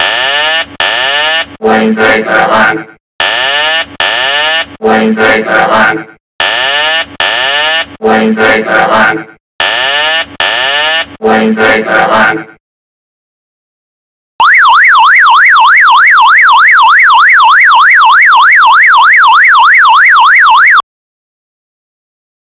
Burglar Alarm
tornado, Fire, defense